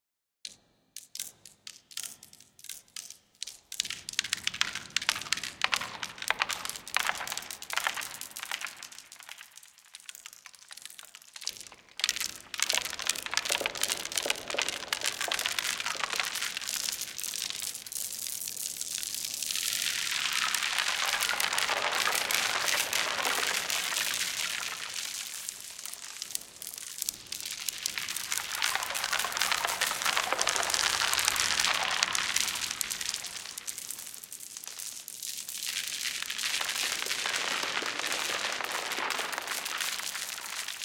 Dropping nails from a box.
Recorded with ZOOM H1. Dropping nails from a box to a desk. Edited with reverb.
abstract, ambient, effect, fun, indoor, machine, mechanic, sounddesign, strange, texture, weird, working